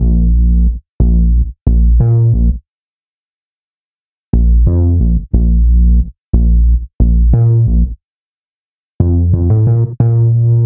jazz, music, jazzy